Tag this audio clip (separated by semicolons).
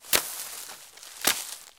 dry; feet; foot; footsteps; grass; movement; step; stepping; steps; walk; walking